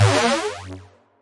UI Wrong button13
game button ui menu click option select switch interface
option, switch, menu, game, interface, ui, click, select, button